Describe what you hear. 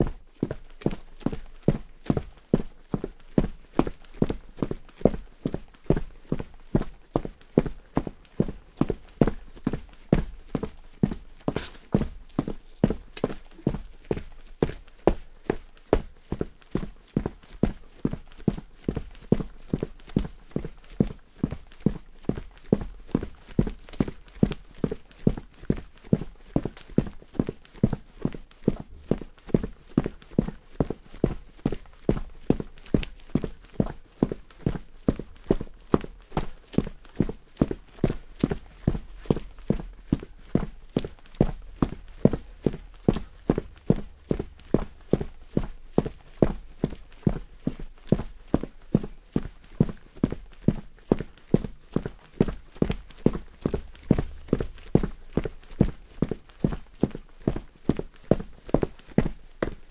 Concrete Footsteps
Me walking as intensively as I can on the concrete floor of my garage in big boots. Intermittent sounds of cars and me struggling to breathe do leak through slightly but the sound is pretty clean overall if you're looking for a pronounced, stompy sound.
footstep, concrete, steps, foley, gritty, stone, pavement, walk, running, walking, boots, footsteps, step